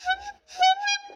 Honk! (Vintage Bicycle Horn)
A vintage bicycle horn. Recorded with mobile phone.